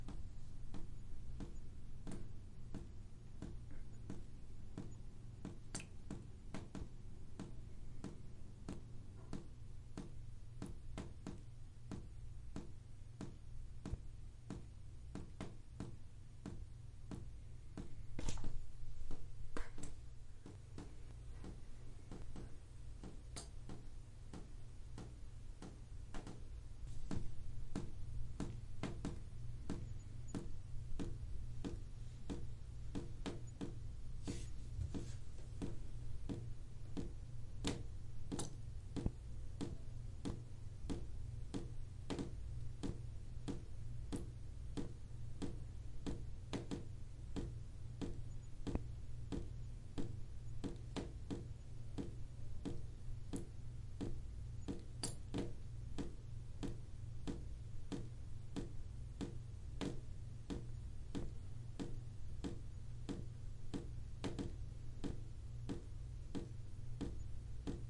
Shower leaking
The sound of shower dripping water.
drip, dripping, drop, drops, leaking, liquid, splash, water